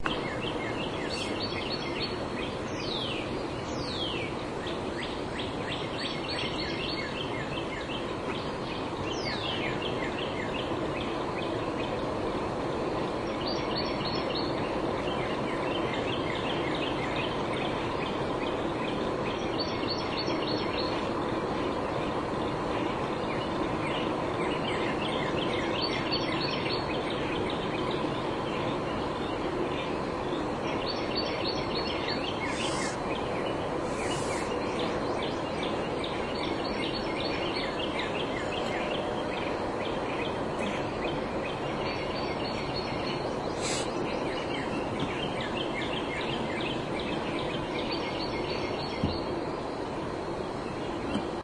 birds chirping 2
singing, chirping, bird, chirp